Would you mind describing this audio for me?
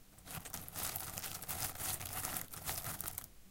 Short potpourris rustling sound made by stirring a bowl of it
crackle, potpourris, rustle, crunch, scrunch